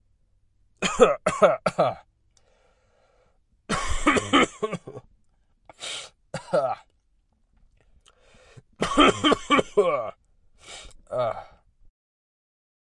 Sick Man SFX
a Sick Man Coughing into a microphone.
OWI, cough, Sick, Unhealthy, Man